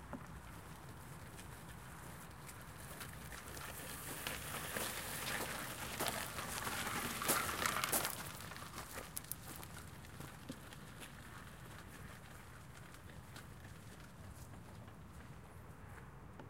SonicSnaps-IDES-FR-wheelchair
The sound of the wheels of the chair running over gravel.
wheelchair, IDES, France, Paris